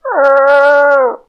Sad, protesting howling sound
(no animals were harmed - this sound was performed and recorded by myself).
dog, cry, bark, crying, pain, high-pitched, yowl, wolf, howl, howling, barking